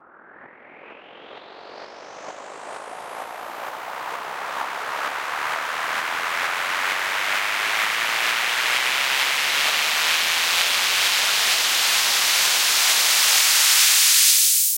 Lunar Uplifter FX 4
For house, electro, trance and many many more!